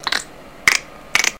click roll
multiple click sounds with tongue
click, roll